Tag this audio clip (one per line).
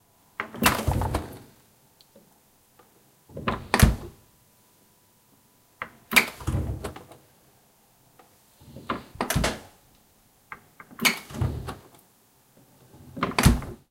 close
door
fridge
open